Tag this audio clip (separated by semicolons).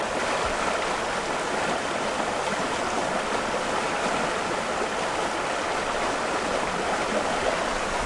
flowing; water